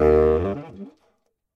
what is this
Baritone Ups eb2 v64
The third of the series of saxophone samples. The format is ready to use in sampletank but obviously can be imported to other samplers. The collection includes multiple articulations for a realistic performance.
sampled-instruments, vst, woodwind, baritone-sax, jazz, sax, saxophone